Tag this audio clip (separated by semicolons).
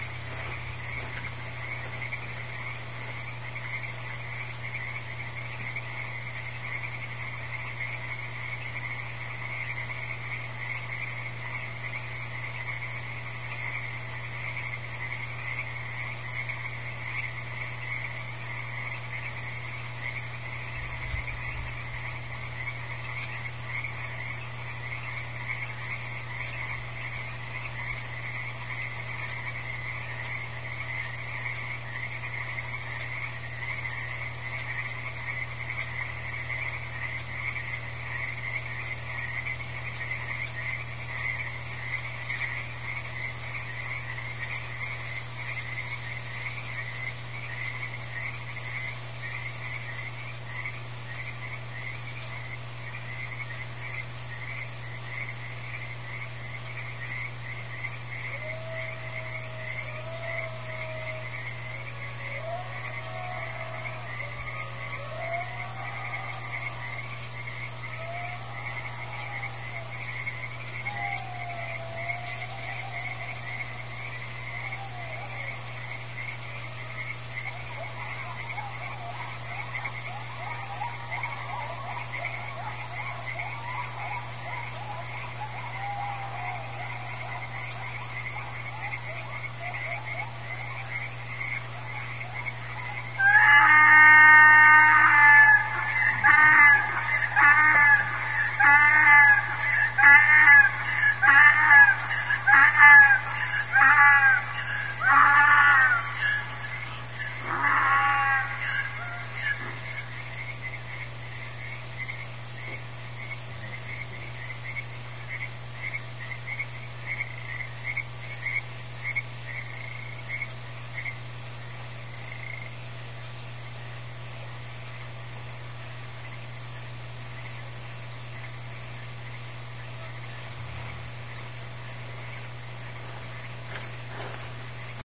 jackass; scared; croak; donkey; bray; nervous; oregon; frogs; rural; central; coyote; animal; field-recording; farm; night; distant; howl